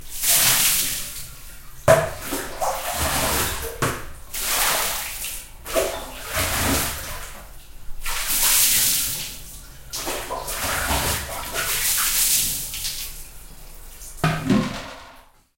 In bath
bath, bathroom, bathtub, tub, water